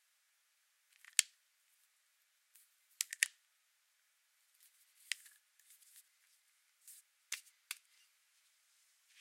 Crack Knuckles Bones
Recording of knuckles cracking. Could be used for a bone snap.
break-bones, crack-knuckles